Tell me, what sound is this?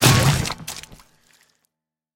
SZ Squish 13
A squishy, gory sound of a car or motorcycle hitting a zombie (or something else perhaps?).
motorcycle, zombie, gory, car, squish, crash, smash